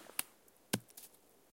throwing a cane II
I recorded a sound of throwing a cane in the forest.